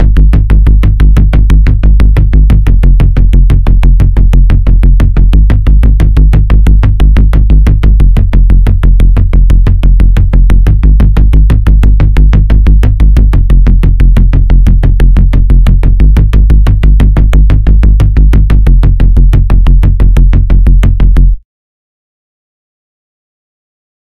Square Bass #1
Square Bass in Serum
Dance, EDM, Electric